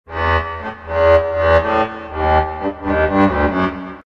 Mr.String
An Excellent recording by me at 120bpm.